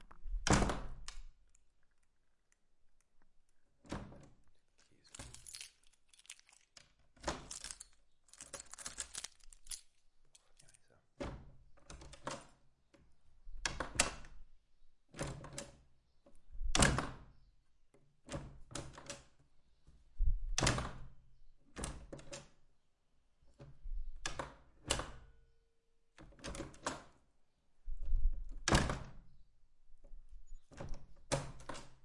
door wood old antique lock open close with handful of keys spring latch heavy various int perspective